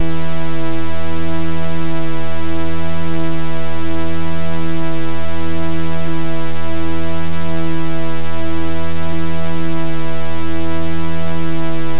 3 Osc Lead Hooverish
Sample i made using the Monotron.
Sample, Synth, Monotron, Analog, Lead, Bass, Analogue, Oscillater